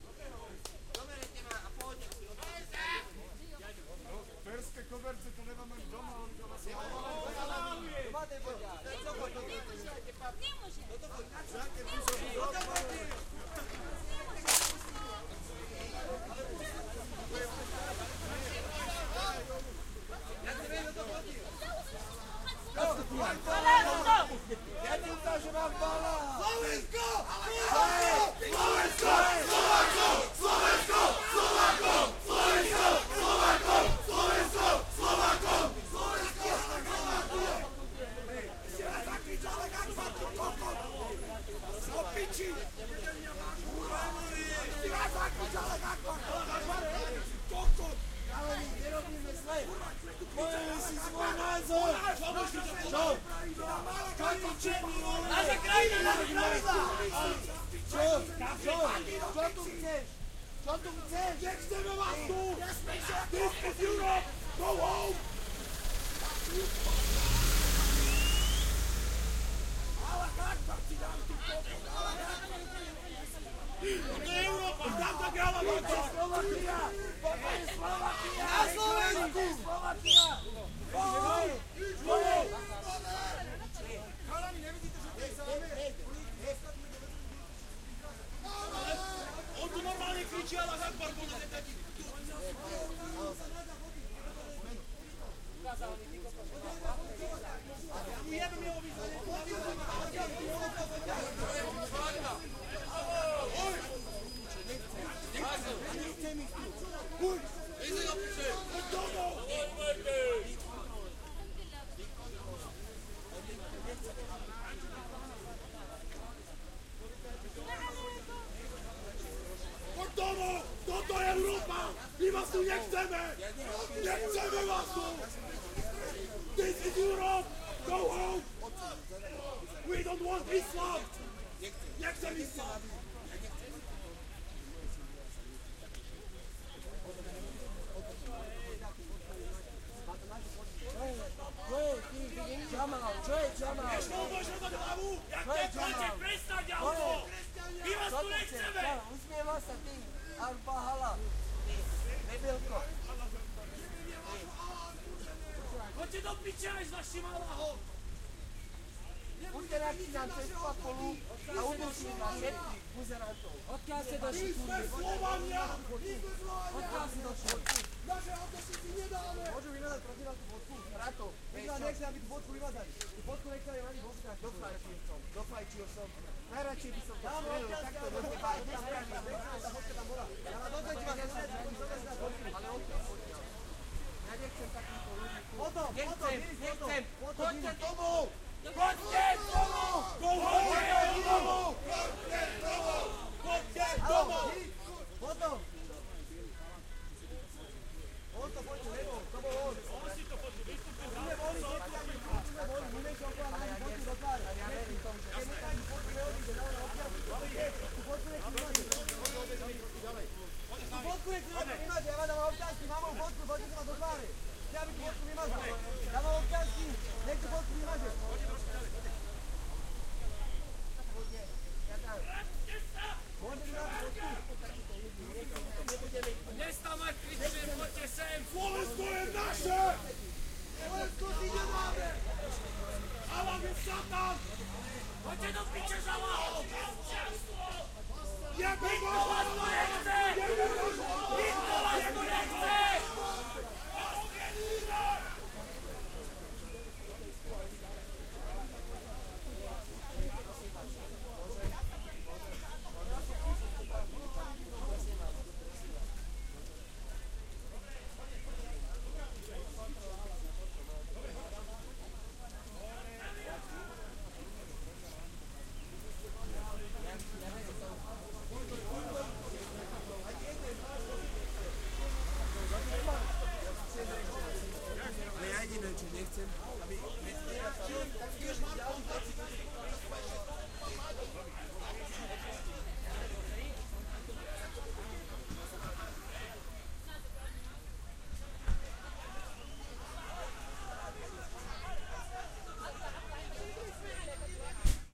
20150620 Bratislava protest 02
nazi skinheads in action 02, protest against islamisation of europe, bratislava-slovakia, main trainstation 20150620
screaming, skinheads